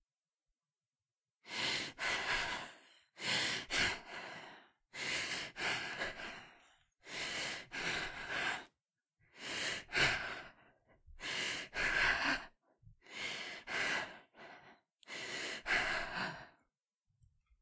Female Heavy Breathing - In Pain
A recording of a woman breathing hard, as if she is in a great deal of pain.